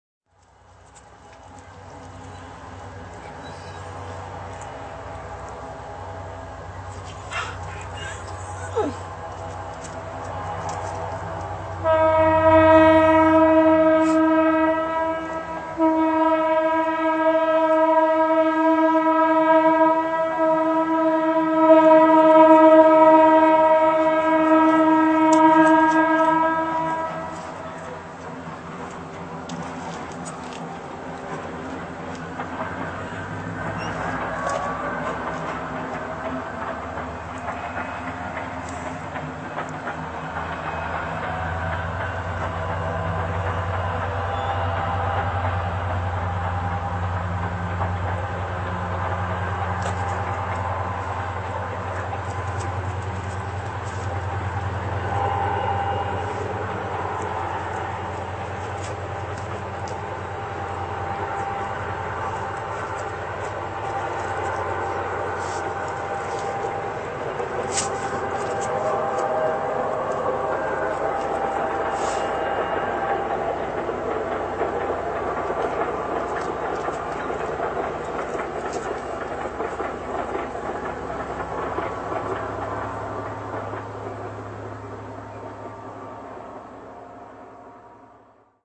E.E.S.N°4 “Bicentenario de la Patria”
Alumnos de 5° año orientación Economía
Proyecto: “¡De qué pueblo sos!”
Materia: Geografía
Docente: Andrea Mundiñano
Autor: Jimena
Título: “Tren”
Lugar: Sargento Cabral alt.300
Fecha/hora: 24/10/2015 21.20hs
+ Info: Escuela de Educación Secundaria Nº4 • Cabildo
+ Info: Sonoteca Bahía Blanca